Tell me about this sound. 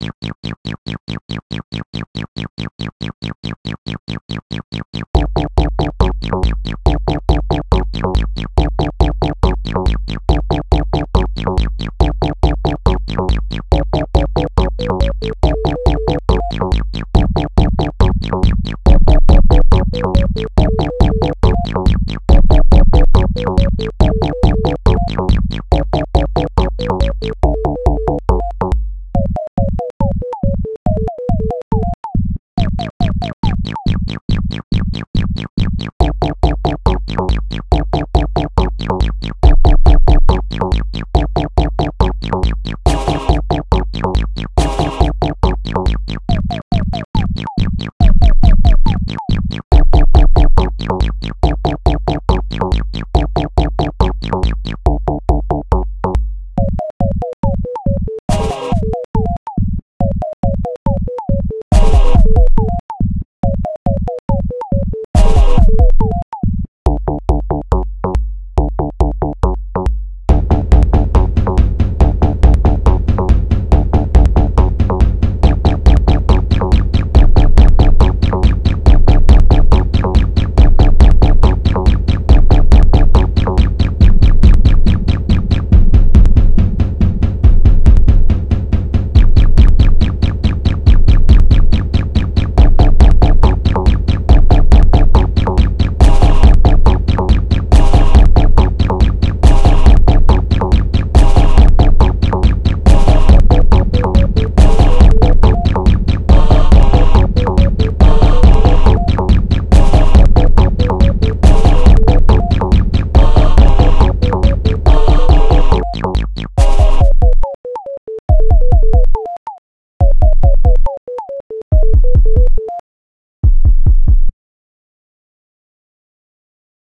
Phone Call from Space
alien, call, dial, game, lmms, loop, mobile, music, number, phone, sci-fi, sound, space, spaceship, telephone, weird